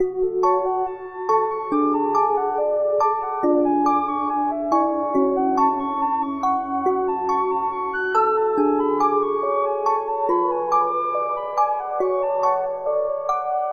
liquide phisio
Weird psycho loop with synth instruments, including bells and flute. A mix of sadness, hope, and worry.